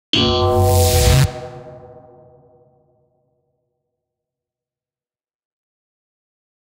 Lazer Pluck 4
Lazer sound synthesized using a short transient sample and filtered delay feedback, distortion, and a touch of reverb.
alien, beam, buzz, Laser, Lazer, monster, sci-fi, spaceship, synth, synthesizer, zap